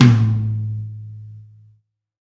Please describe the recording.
DW drum kit, used: Sennheiser e604 Drum Microphone, WaveLab, FL, Yamaha THR10, lenovo laptop
drum, drums, DW, hit, kit, mid, percussion, tom